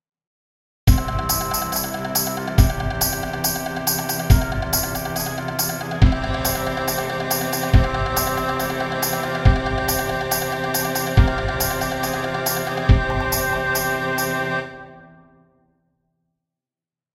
A short segment of music with a decidedly Egyptian theme to it. Has a very fast tempo as it was created for an endless runner game.
egyptian, themesong, arabian, music